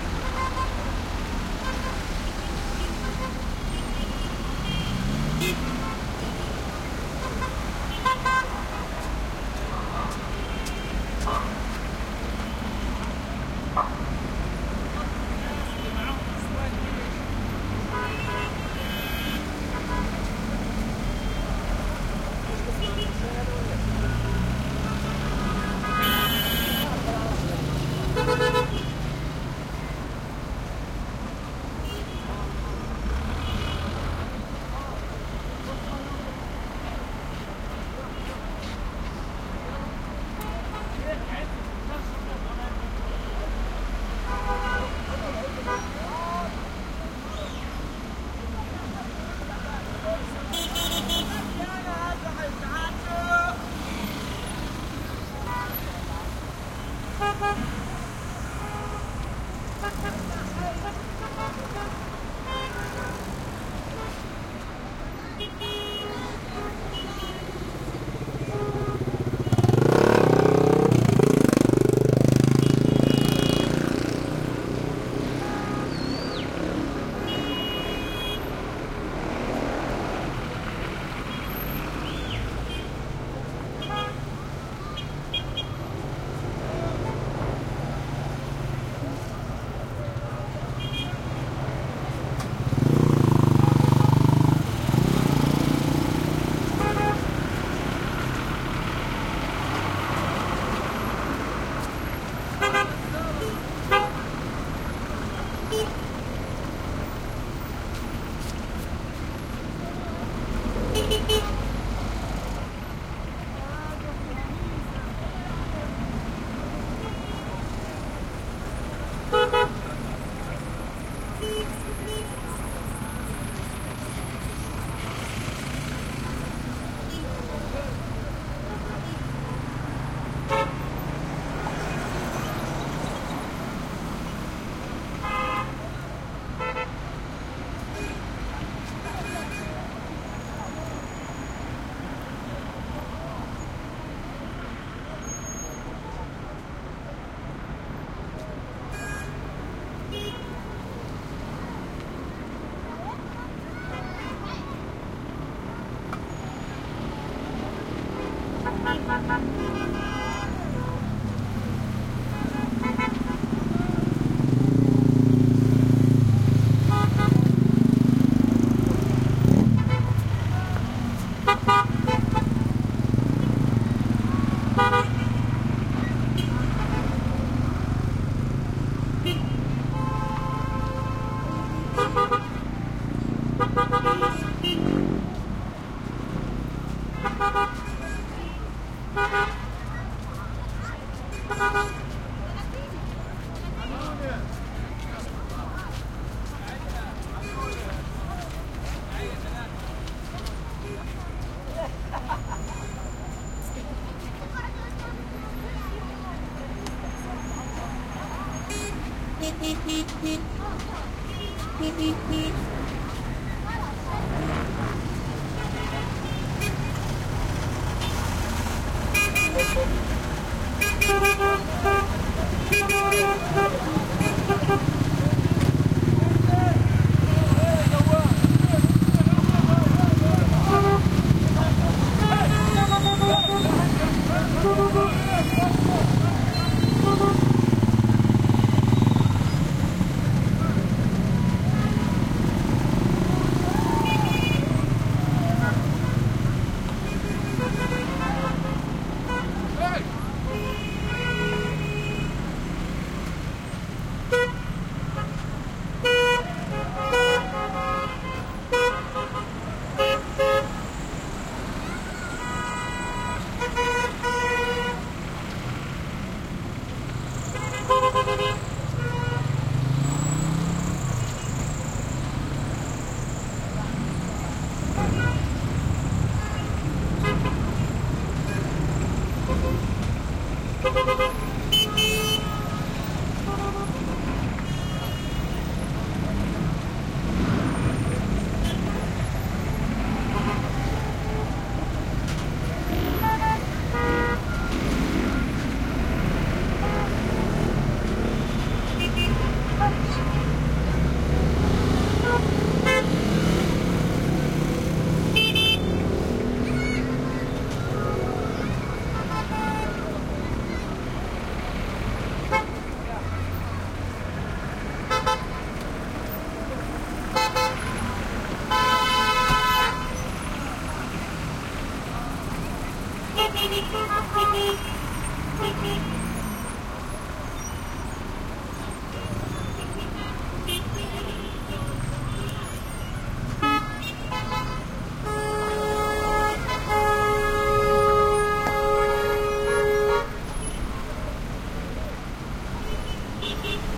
traffic medium Middle East busy intersection throaty cars motorcycles mopeds sandy grainy steps haze and horn honks2 fewer people arabic Gaza 2016
busy, city, East, intersection, medium, Middle, traffic